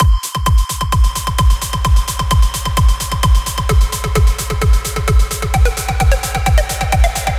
Simple Loop made In Fl Studio
music, simple